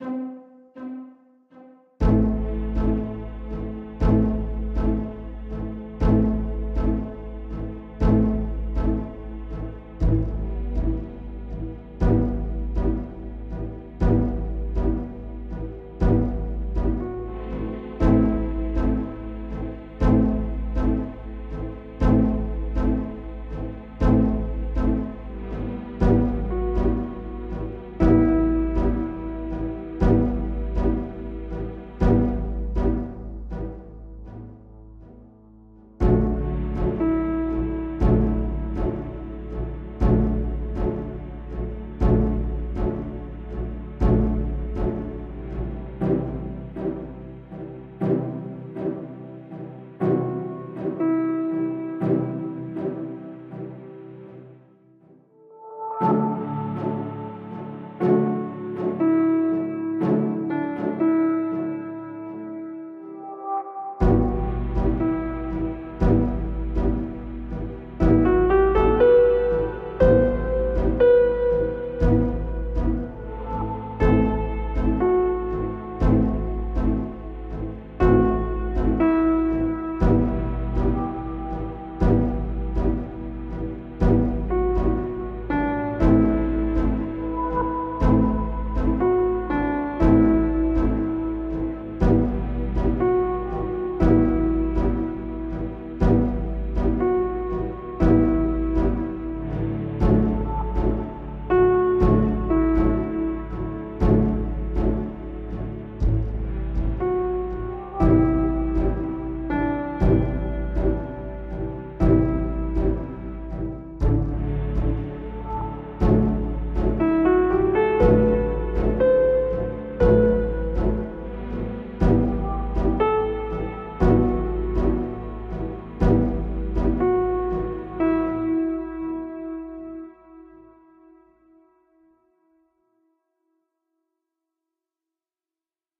"sad Waltz" piano track and orchestra.
Sounds:Ableton live,kontakt sampler,yamaha piano.
433hz, Ableton, ascending, cinematic, dramatic, film, filmmusic, finale, interlude, intro, kontakt, melancholic, movie, orchestra, orchestral, original, outro, piano, repetition, sad, slow, soundtrack, strings, track, viola, Waltz, yamaha